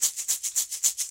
Egg Shaker 02
Shaker Percussion Home-made
Home-made, Percussion, Shaker